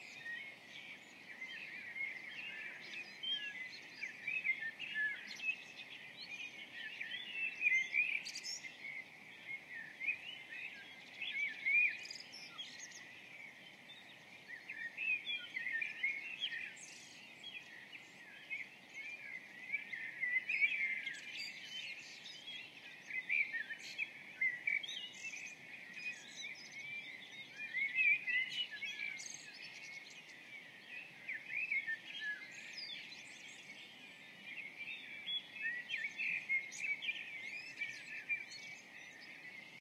Morning Amb & Birds
ambient, background-sound, ambience, soundscape, atmosphere